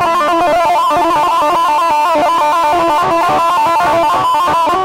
Sounds produced tapping with my finger nail on the strings of an electric guitar, with lots of distortion applied. Recording was done with an Edirol UA25 audio interface. This set of samples are tagged 'anger' because you can only produce this furious sound after sending a nearly new microphone by post to someone in France, then learning that the parcel was stolen somewhere, and that you've lost 200 Euros. As it happened to me!
(Ok, I'll write it in Spanish for the sake of Google: Esta serie de sonidos llevan la etiqueta 'ira' porque uno los produce cuando mandas un microfono por correo a Francia, roban el paquete por el camino y te das cuenta de que Correos no indemniza por el robo y has perdido 200 Euros. Como me ha pasado a mi)
anger, strings, guitar, distortion, tapping